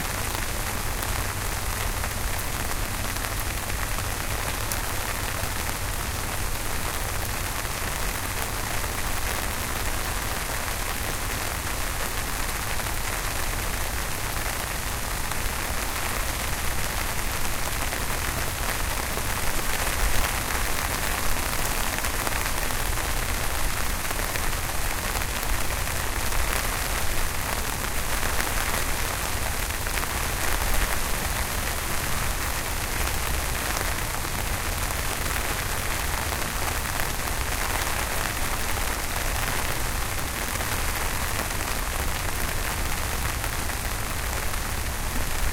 rain on umbrella
Some light-ish rainfall on an umbrella. Picks up near the middle of the track, then smooths off near the end again and loops seamlessly. Recorded with a Roland Edirol R-09HR and edited in Audacity.
weather, outdoors, shower, water, umbrella, nature, rainfall, raining, field-recording, rain